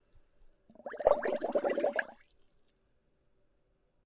Bubbles Gentle With Fizz
Short Bubble sequence with gentle fizz aftermath